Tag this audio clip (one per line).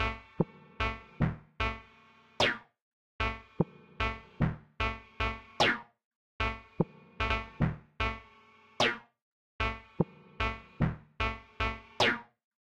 stereo
synth